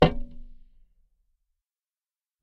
Metallic impact sound. Contact microphone recording with some EQ.